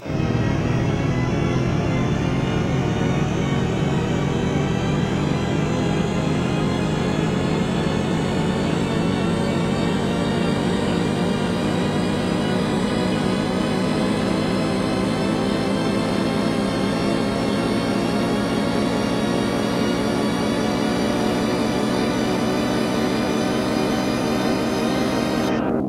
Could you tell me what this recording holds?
spaceship takeoff
Started off as a Cm chord on my keyboard then stretched and pich altered and other effects layered